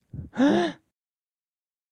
Gesto De Sorpresa s
male, Surprise, voice